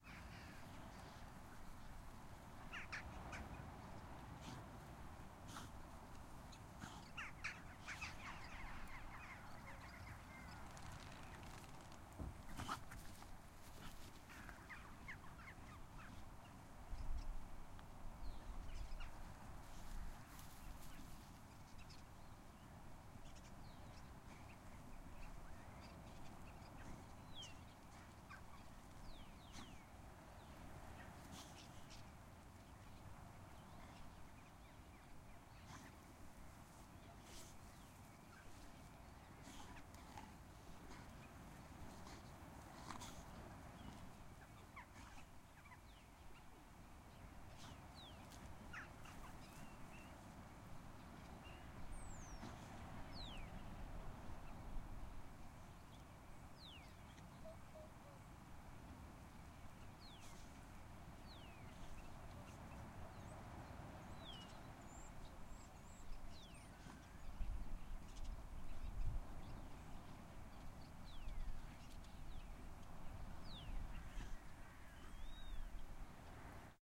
marshland Ambiance recording